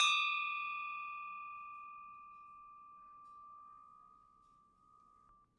Paolo Soleri windbell from the Consanti bell foundry, Arizona.